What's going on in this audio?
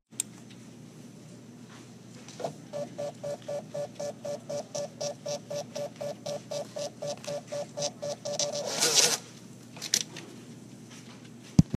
Receipt Printing
The local library has a printer whose noise I thought was quite unique. I forgot to record it the first time, so the second time I checked out books I made sure to record it.
There's a little bit of background noise, but it is a public library.
I'd love to hear where it ends up]
ambience, field-recording, library, receipt